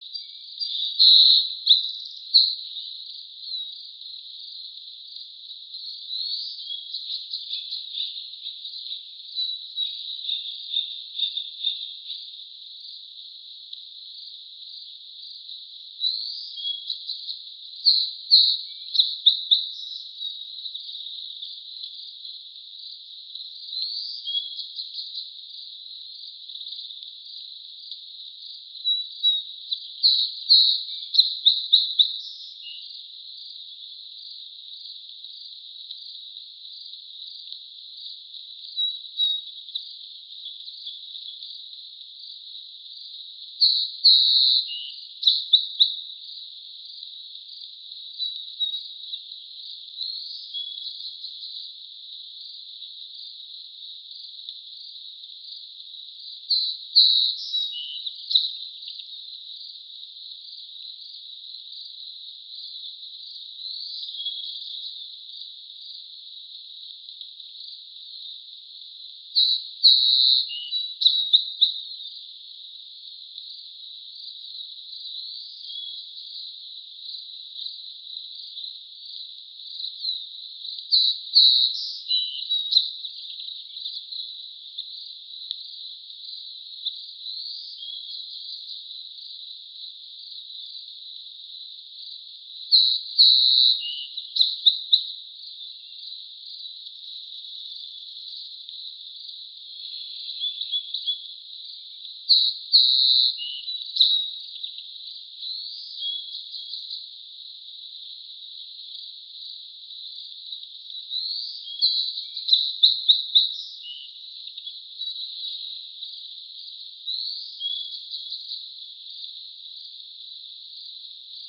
nature grass valley ca 002
Collected recordings of bird and pond life sounds.
recorded at Grass Valley CA USA 2013.
ambiance, ambient, birds, California, field-recording, insects, marshes, nature, pond, Sierra-foothills, spring, USA